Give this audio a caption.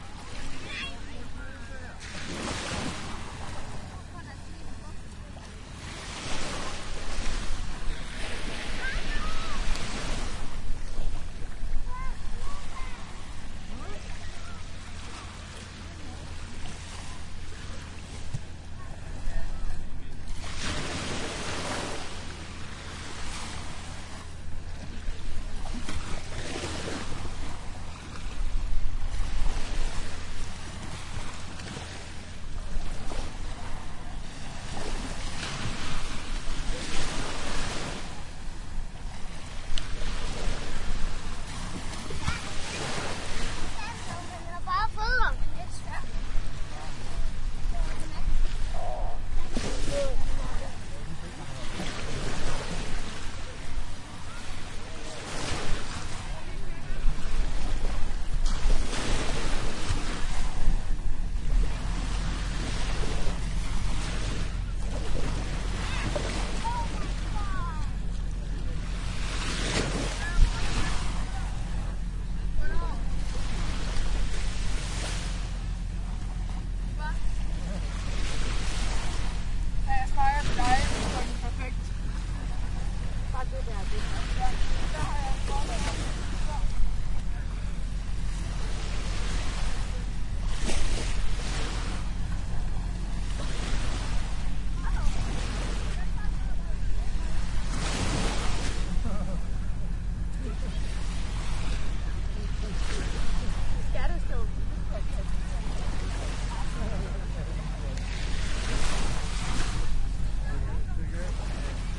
Eastern shore at skagen branch 07-26 02
Recorded at the northern tip of Denmark, as far north as you can get. This recording is on the east coast, and is remarkable as it differs very much from the west coast waves from the same area. There's a little more voices here, than in the first sample of eastern shore at skagen branch. Sony HI-MD walkman MZ-NH1 minidisc recorder and two Shure WL183
beach, people, voices, water, wave, waves